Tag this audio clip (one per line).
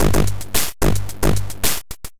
bertill
crushed
destroyed
drums
free
needle
pin